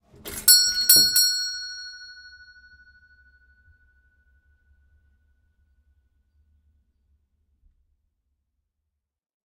Old fashioned doorbell pulled with lever, recorded in old house from 1890